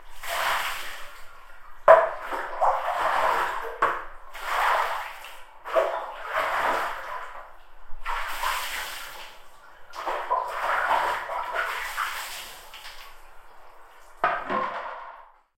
Out of bath
bath, bathtub, tub, water